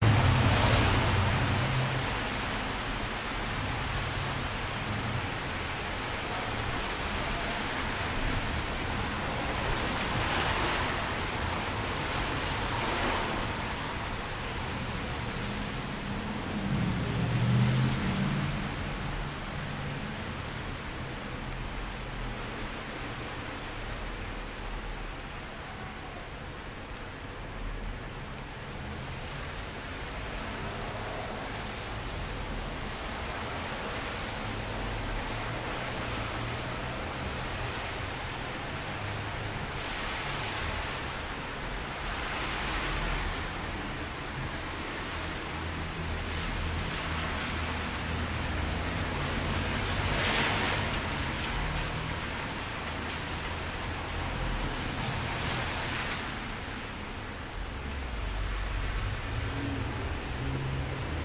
Street Scene - After The Rain - Moderate Traffic & Wet Road
Recorded with a - Zoom 4n pro - (during and) after the rain in the middle of the city.
cars, city, field-recording, rain, road, street, traffic